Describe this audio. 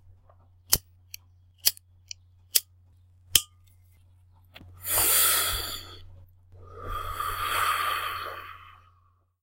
Having A Smoke
Recording of me lighting a cigarette with a disposable lighter. Noise Reduction used. Recorded at home on Conexant Smart Audio with AT2020 USB mic, processed with Audacity.
after-sex, cigar, cigarette, inhaling, relax, smoker, smoking, tobacco